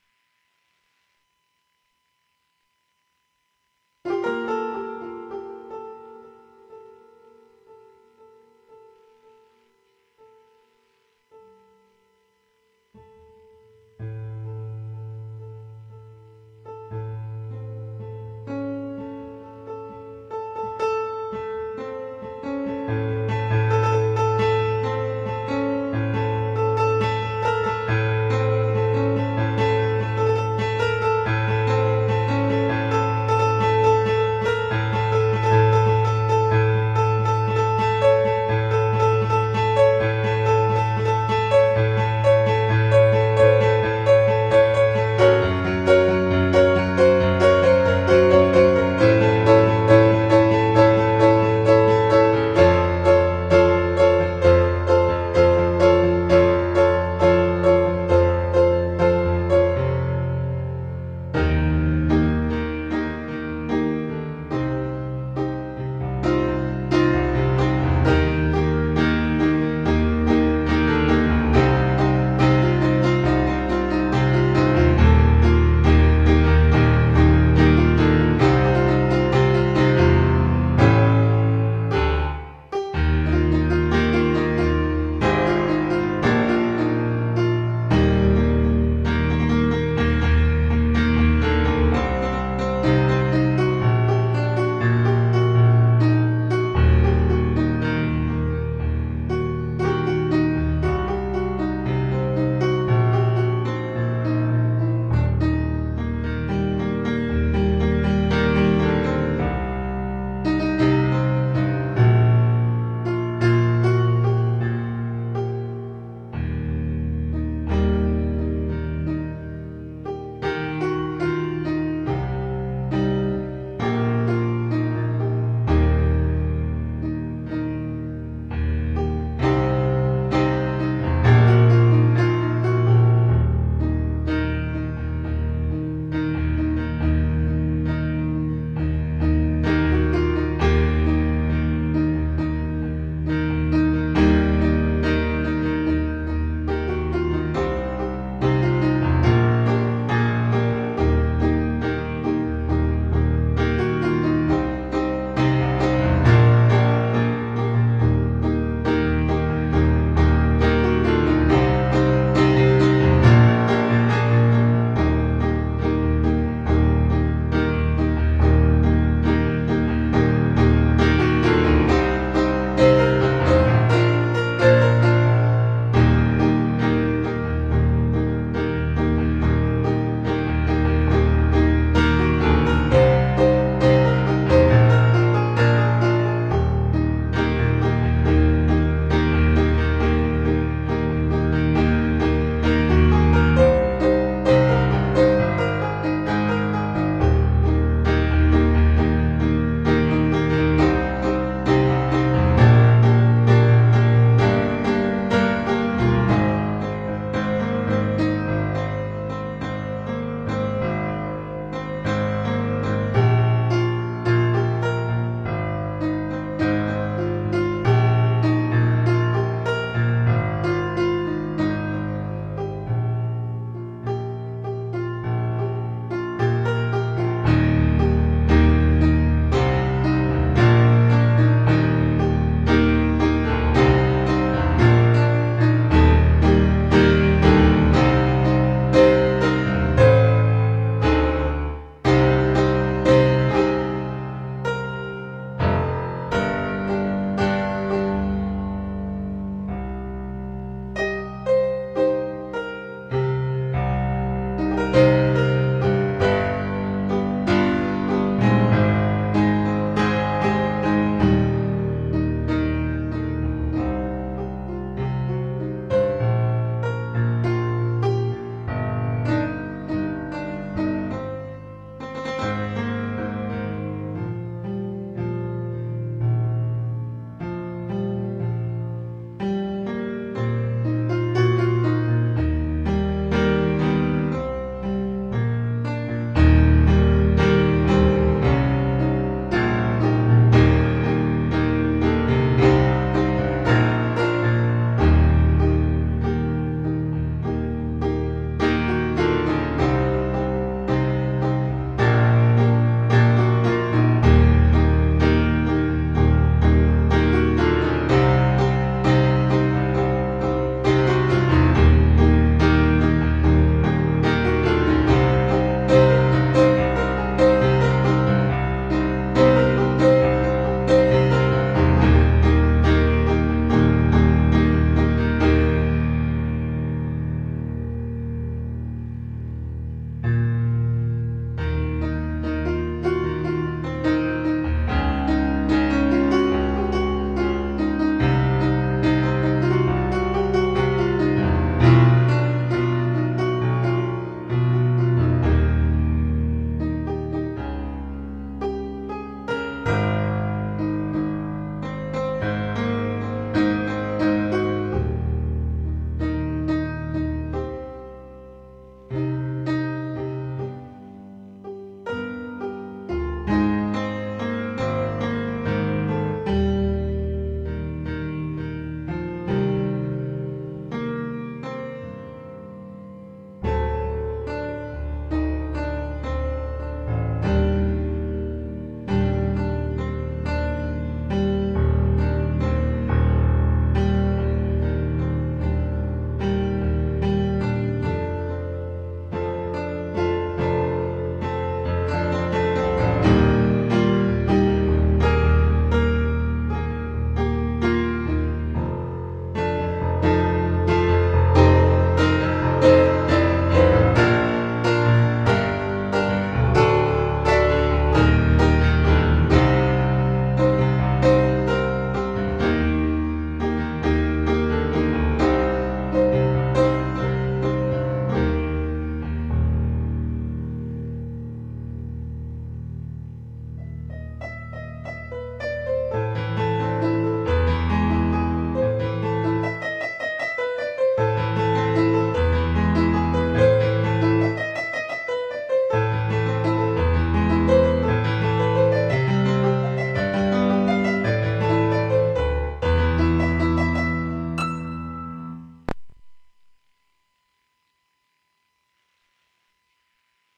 piano improvB 2 27 2011
Recorded using an Alesis QS8 keyboard using a direct signal. This sound file is unedited so you will most likely hear mistakes or musical nonsense. This sound file is not a performance but rather a practice session that have been recorded for later listening and reference. This soundfile attempts to pertain to one theme, as some of the older files can be very random. Thank you for listening.
electric, improv, improvisation, piano, practice, rough, theme, unedited